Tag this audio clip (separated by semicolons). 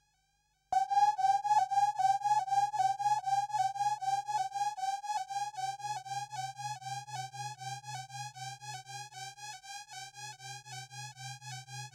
synthesiser; sample; sound; fx